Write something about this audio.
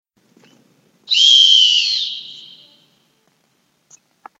High pitch gym whistle.
Loud, Gym, Whistle, Sound, Foley